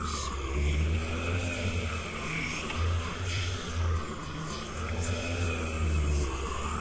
shadoBoss stun loop
Looping evil whispering by a large nightmare monster while it's stunned.
Dubbed and edited by me.